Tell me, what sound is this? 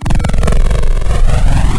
Electro Static
Electronic shock discharge from a SciFi style electricity based weapon. Single firing.